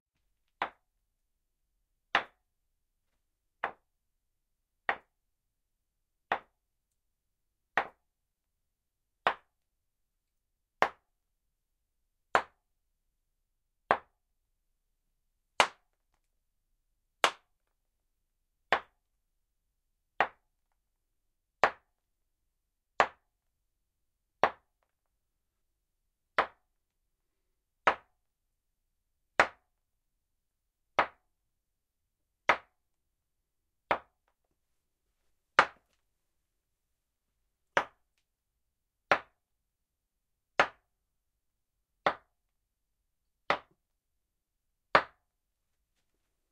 Rock On Rock
A stereo recording of two lumps of stone struck together. Rode NT4 > Fel battery Pre-amp > Zoom H2 line-in.
percussion, xy, hit, rock, masonry, hits, request, stone, stereo